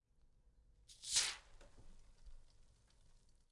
Horror; throat cut; close
Recording of soda spewing to simulate a throat of being cut.
blood, horror, spurt, throat-cut